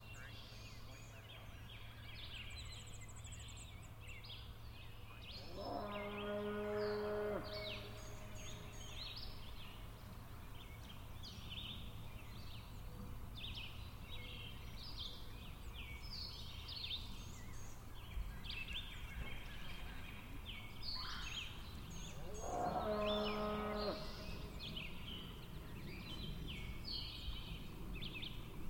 Nature ambient
The clean/unprocessed sound of nature and a stupid cow.
ambient, field-recording, forest, nature, wildlife